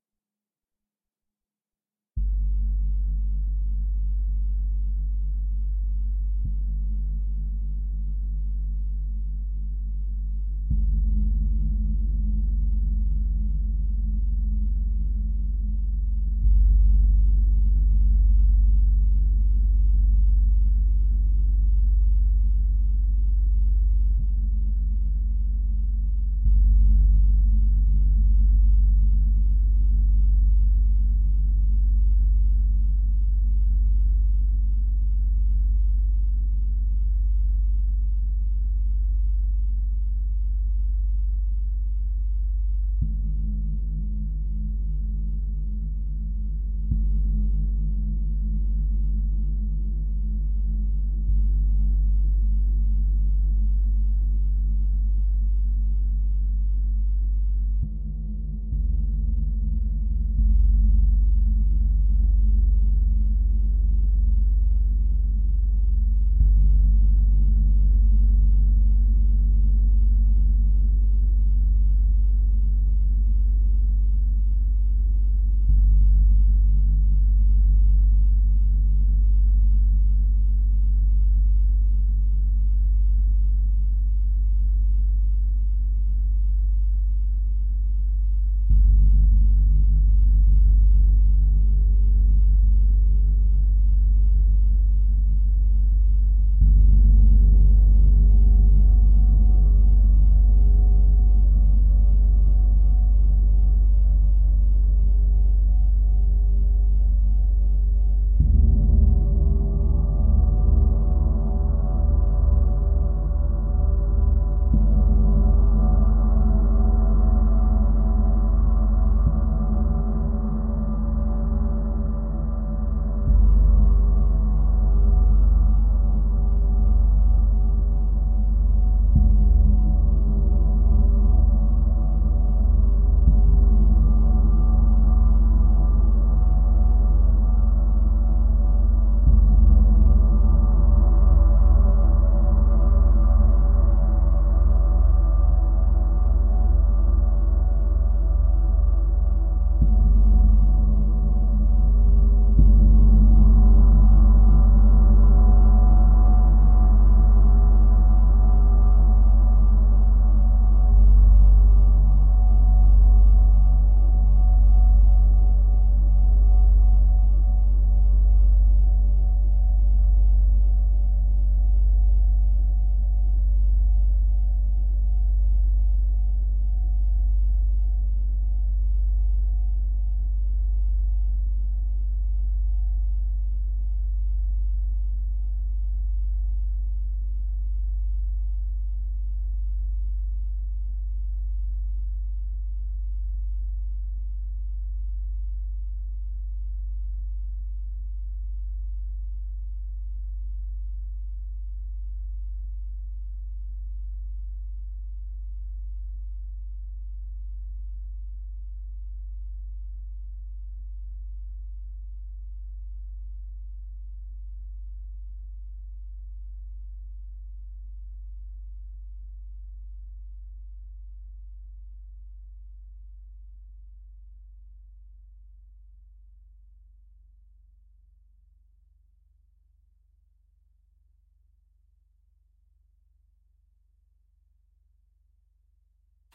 Gong Hits 01
Close mic of multiple, slow hits on a 40" Paiste gong giving a swelling drone. Lots of low-end in this clip. We recorded this with some high quality gear.
Schoeps CMC6/Mk4 > Langevin Dual Vocal Combo > Digi 003
swell, drone, peaceful, meditation, gong, metal